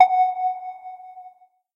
GUI Sound Effects